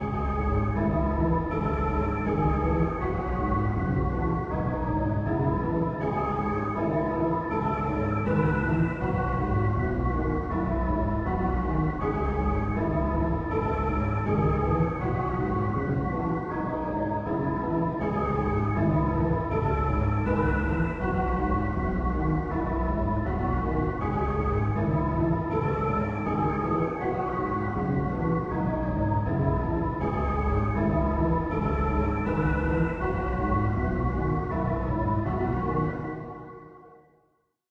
Like an old zelda melody
Little loop, simple melody, reminding the early zelda. Childish, at 80bpm, made in FL.
80bpm, fantasy, hammer, old, sequence, zelda